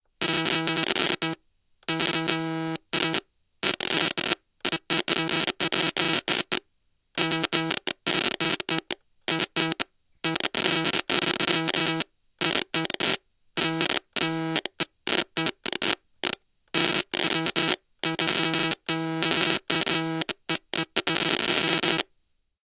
Glitching, Stylophone, A
Raw audio of a glitching stylophone. This effect is caused by lightly scraping the metal stylus over the keys (as opposed to forcefully pressing the stylus). This confuses the connection, causing a spontaneous glitching as the device struggles to decide whether the key is pressed or not.
An example of how you might credit is by putting this in the description/credits:
The sound was recorded using a "H4n Pro Zoom recorder" on 2nd November 2017.
glitch, stylophone, synthesizer, glitching, synthesiser